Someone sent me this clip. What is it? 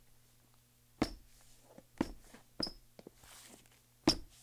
Shoe Squeak 1-01
Shoe squeaks on tile floor